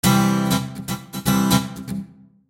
Rhythmguitar Cmaj P105
Pure rhythmguitar acid-loop at 120 BPM
120-bpm, loop, rhythm, rhythmguitar